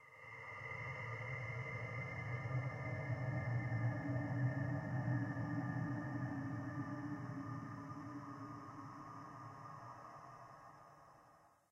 Atmospheric sound for any horror movie or soundtrack.
Terror, Halloween, Evil, Freaky, Horror, Atmosphere, Scary